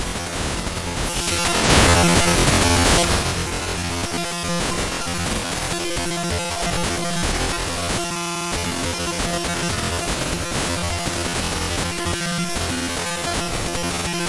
A sound breaking